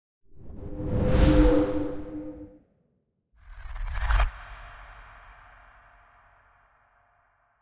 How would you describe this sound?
TITLEFLIGHT-DARK
A dark whoosh for titles, with a dissolving effect at the tail.
cinematic, drama, flight, hit, impact, intro, l3, l3rd, lower, metal, outro, ringing, sound, sting, title